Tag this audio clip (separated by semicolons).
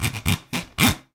vol; sounds; 0; natural; zipper; scratch; noise; egoless